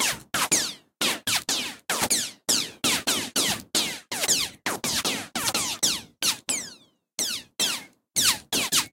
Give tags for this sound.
bullets; ricochet

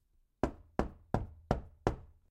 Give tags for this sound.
door; knocking; wooden

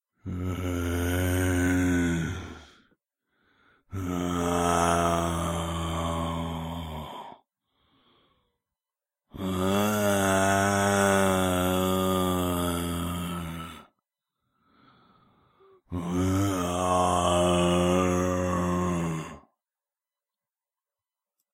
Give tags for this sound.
creature; Scary; Zombie

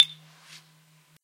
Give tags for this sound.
swoosh; bottle; high; ping; empty

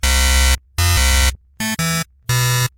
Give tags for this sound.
beep; denied; failure

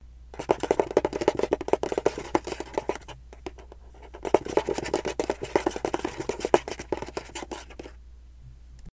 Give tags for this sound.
foley; nails; gross; rat; mouse; animal; horror; creature; monster; scurry; beast

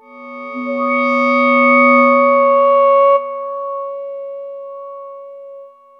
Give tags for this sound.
bell; experimental; multisample; reaktor; tubular

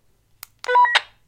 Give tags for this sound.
radio; walkie-talkie; beep

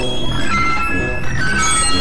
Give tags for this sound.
synth; electro; noise; processed; 120bpm; electronic; music; percussion; loop

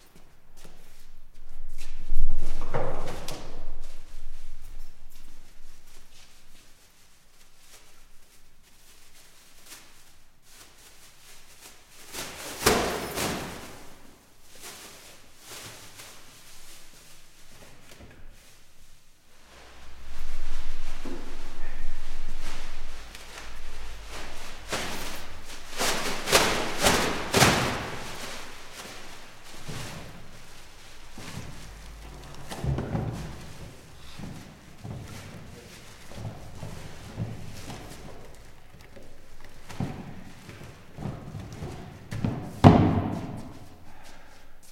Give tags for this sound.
Bag; Container; Free; Plastic; Rustle; Sound; Trash; Trashcan

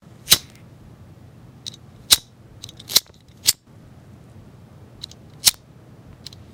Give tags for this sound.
bic; fire; light; lighter

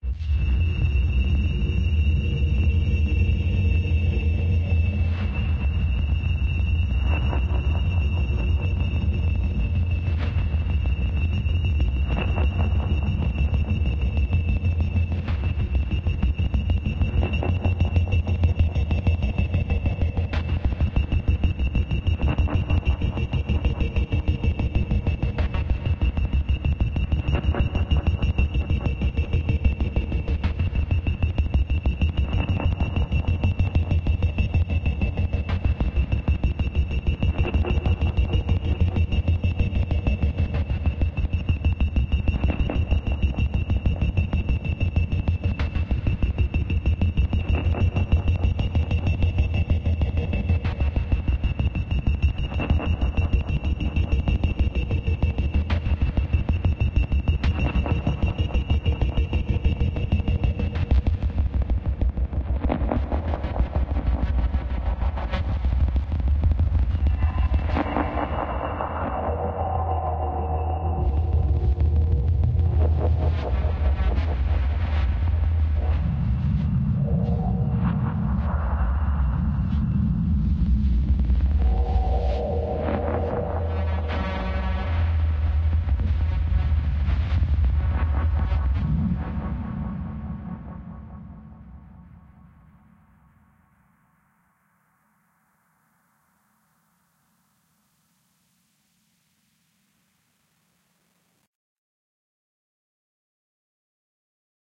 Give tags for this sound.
95; abstract; design; digital; electric; electronic; Experimental; feedback; freaky; future; glitch; noise; reverb; sound-design; strange; Tension; tremolo; weird

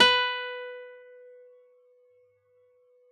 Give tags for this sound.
1-shot; acoustic; guitar; multisample; velocity

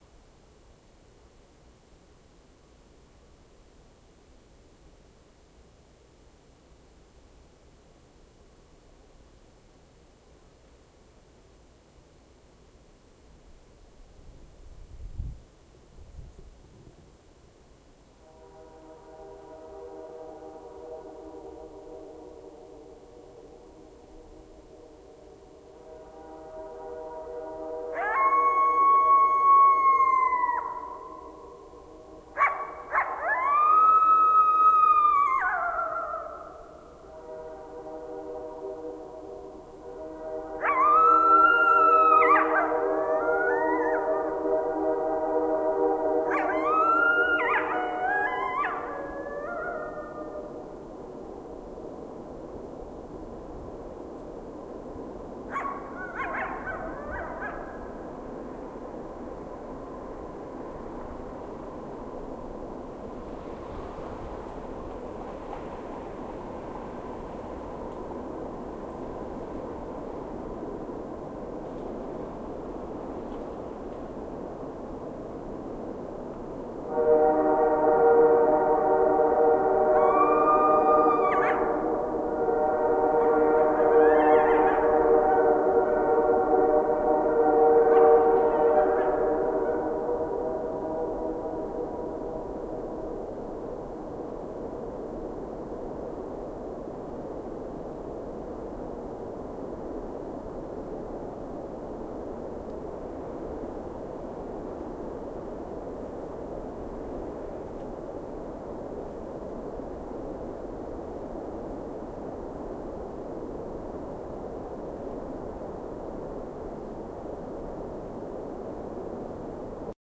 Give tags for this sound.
banff; whistle; horn; coyotes; howling; train; coyote; howl

